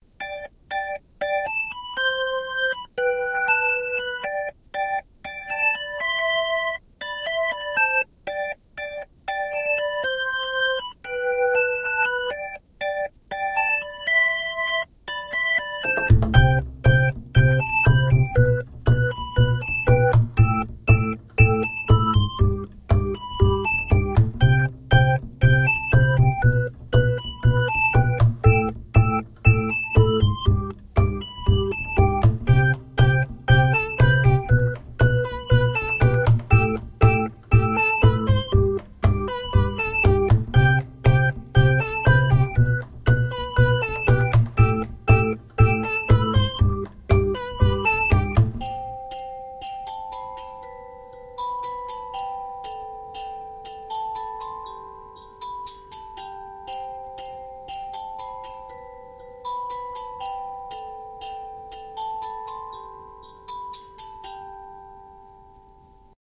Genre: Cute, Happy
I was exploring some presets on VST and I encountered some weird/unique presets that are highly compatible with the cute music genre. So I've made this cute background music.